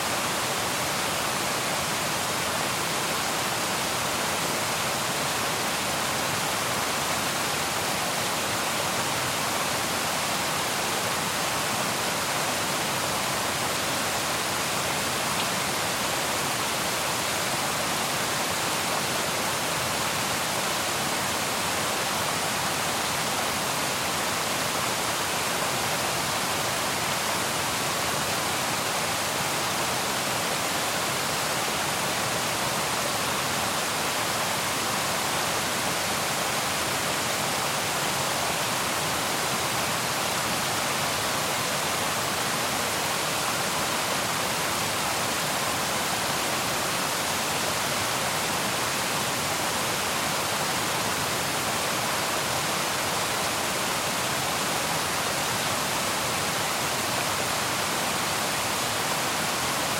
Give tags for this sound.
Ambience; Background; Creek; Dam; Flow; Mortar; Nature; River; Splash; Stream; Water; Waterfall

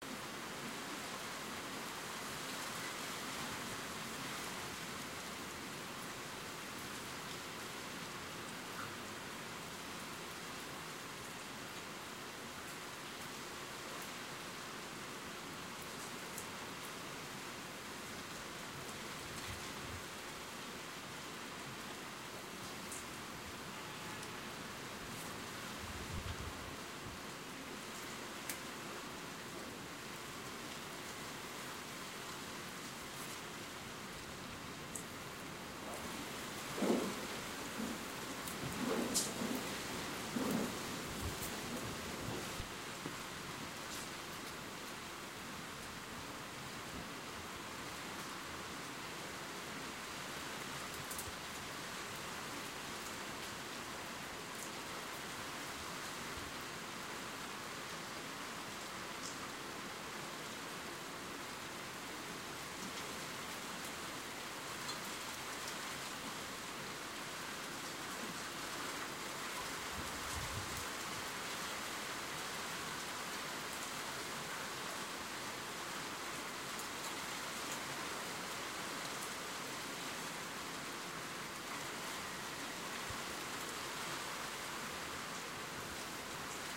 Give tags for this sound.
light; sound; tehran

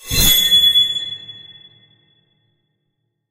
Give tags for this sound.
metal; resonance; shing; sword

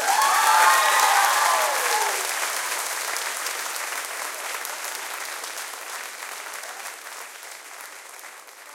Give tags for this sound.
applauding; theatre; show; Clapping; auditorium; claps; Applause; concert; cheer; audience; cheering; People; Crowd

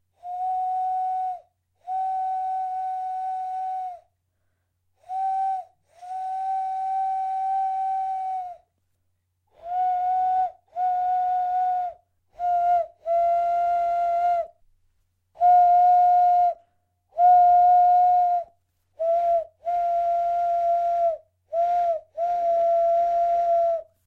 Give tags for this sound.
Whistle; sound-effects; sounds; train

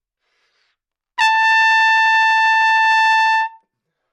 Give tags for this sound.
A5; good-sounds; multisample; neumann-U87; single-note; trumpet

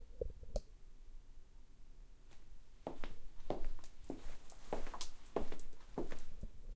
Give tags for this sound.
floor; tile; footsteps

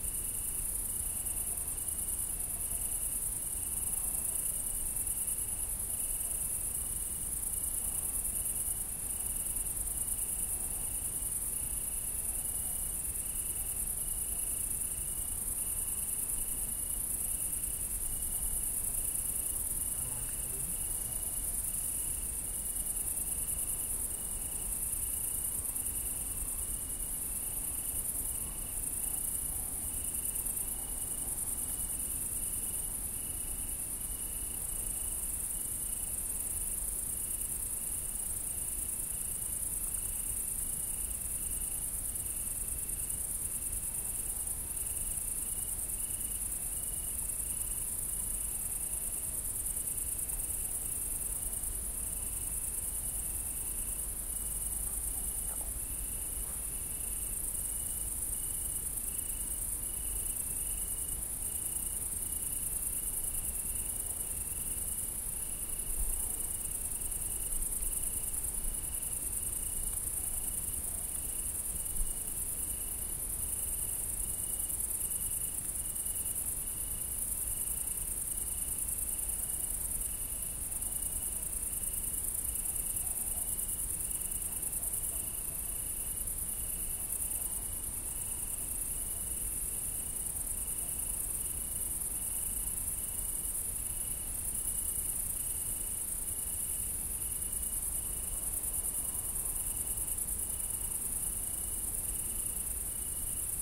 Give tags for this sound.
ambience; crickets; Allier; field-recording; France; nature; country; ambient

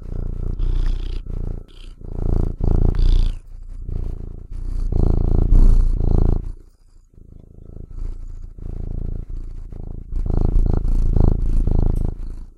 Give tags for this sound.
cat; effects; fx; h1; pur; purr; purring; recorder; sound; soundfx; stereo; zoom

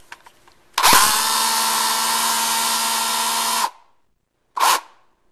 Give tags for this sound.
broken; drill; electric; mechanical; shop; tools

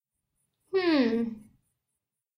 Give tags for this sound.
girl; disapproval; hmmm

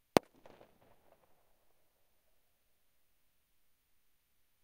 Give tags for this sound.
Boom; Explosion; Firecrackers; Loud

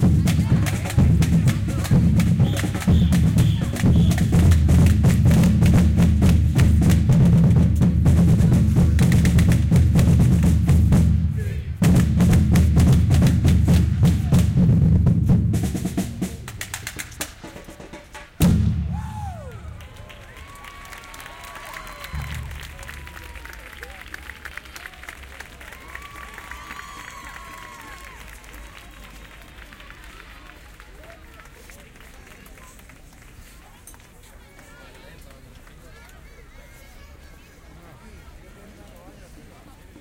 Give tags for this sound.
ambiance; binaural; christmas; city; drums; field-recording; percussion; spain; street; winter

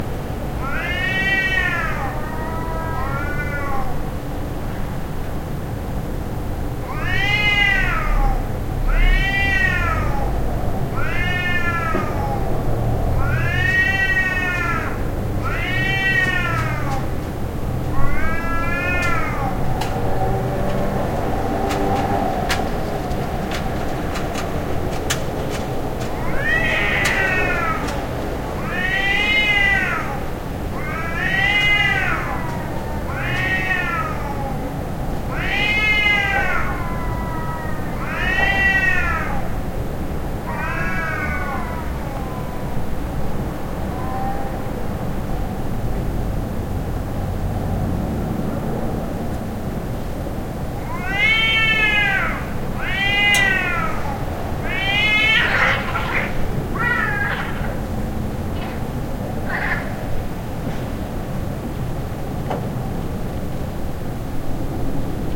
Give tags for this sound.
animal; animals; cat; catfight; cats; domestic; fight; meow; pet; pets

